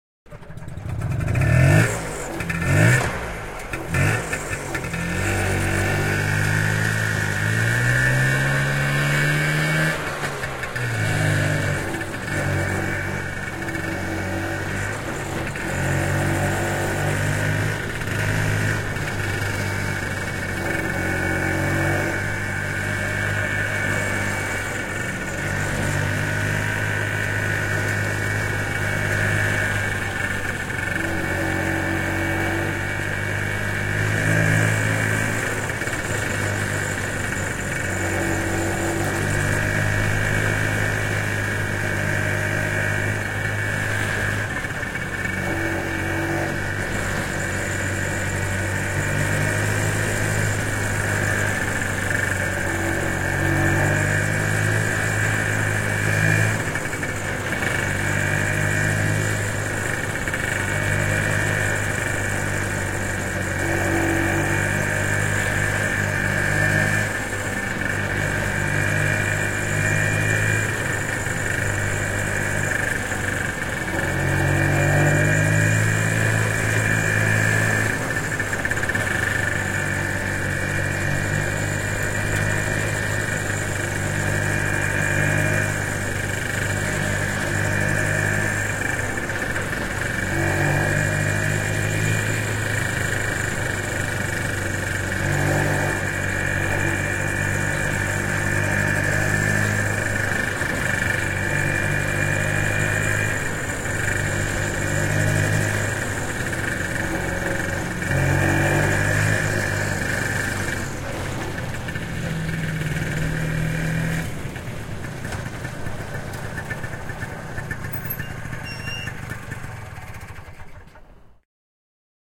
Moottoripyörä, vanha, ajoa asfaltilla / An old motorbike, start, riding on asphalt, stopping, switch off, Messerschmitt, 191 cm3, a 1962 model, roofed
Messerschmitt, katettu, 191 cm3, vm 1962. Käynnistys, ajoa mukana asfaltilla, pysähdys, moottori sammuu. (Messerschmitt KR 200, 10 hv, 2-tahtinen).
Paikka/Place: Suomi / Finland / Riihimäki
Aika/Date: 02.09.1990
Field-Recording, Finland, Finnish-Broadcasting-Company, Motorbikes, Motorcycling, Soundfx, Suomi, Tehosteet, Yle, Yleisradio